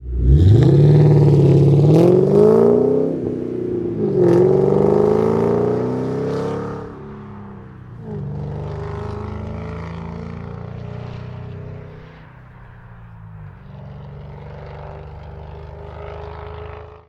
Sound of a Mustang GT500. Recorded on the Roland R4 PRO with Sennheiser MKH60.